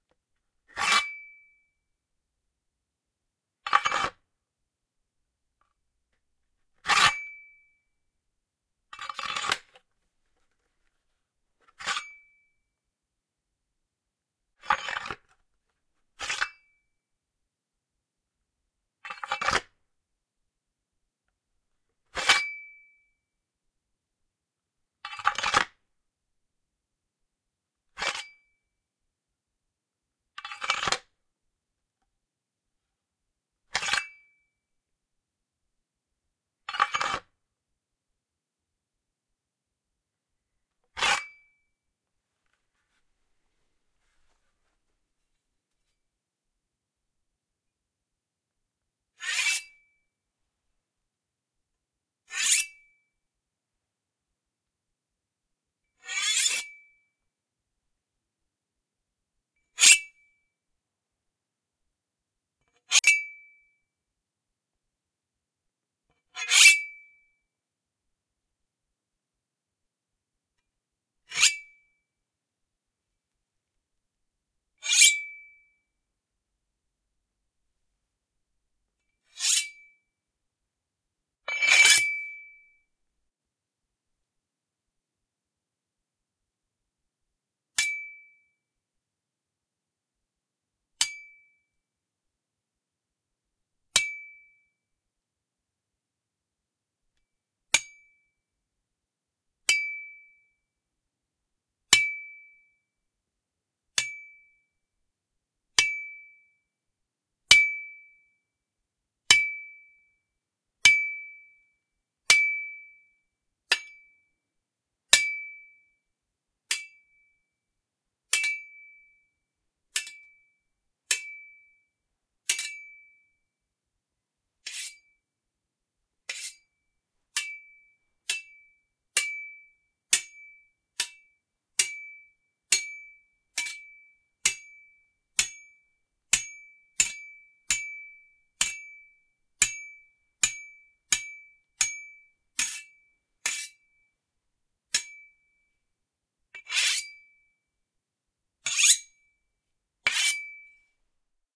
Sword Simulations
A stereo recording of a sheathed carbon steel pruning saw that sounds a bit like a sword. Sheathing and unsheathing to begin with then stroking and hitting with a file. Rode NT-4 > Fel battery pre-amp > Zoom H2 line-in.
blade, ching, cinematic, knife, metallic, scabbard, scrape, sheath, silky, steel, stereo, sword, ting, xy